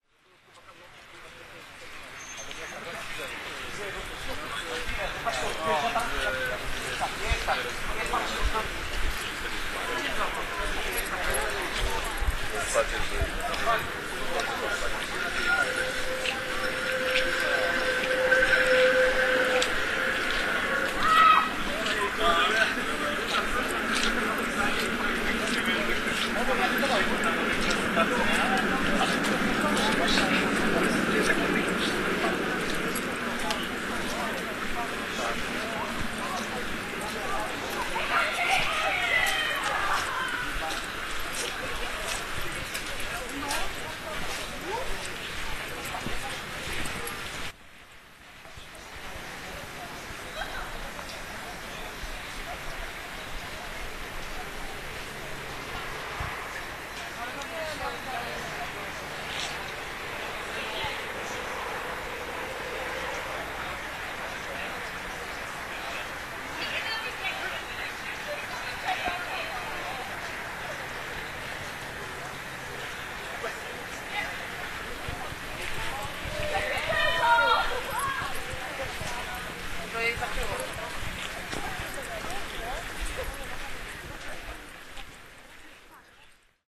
warta river1 280510
28.05.2010: about 23.00. In a park located on the Warta river bank (in the center of Poznan, near of the Sw. Roch bridge). at that moment we have the flood and many, really many people walking along the Warta river banks observing how fast it is overfilling. The sound produced by dozens young people walking, sitting in park benches, drinking beer, smoking joints, talking and watching the Warta river.
more on:
voices, open-air-party, flood, warta-river, poland, field-recording, city-park, people, night, youngs, park-bench, poznan